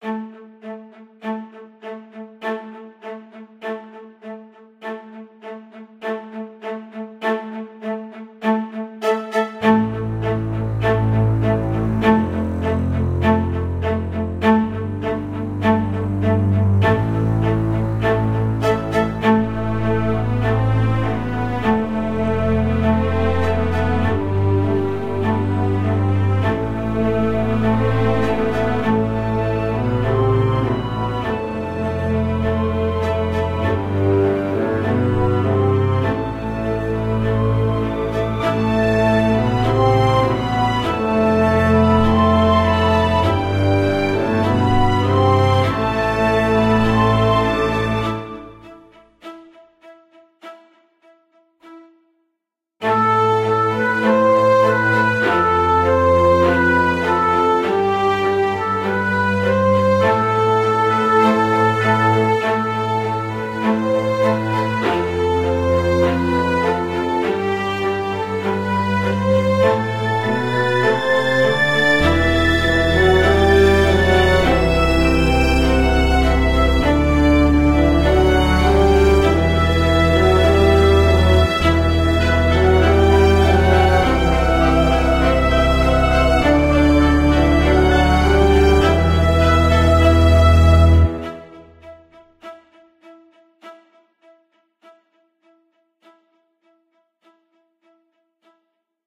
Uplifting Dramatic Soundtrack - War Around Us
score, timpani, cinematic, music, film, dramatic, emotional, epic, atmosphere, ambient, outro, classical-music, battle, orchestral, misterbates, violin, theme, orchestra, best, movie, sypmhonic, free, soundtrack, strings, war, hans-zimmer